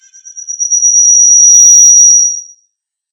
microphone feedback7
A Blue Yeti microphone fed back through a laptop speaker. Microphone held real close to invoke feedback. Sample 3 of 3, normal (high) pitch.
feedback, harsh, microphone, noise, oscillating, squeal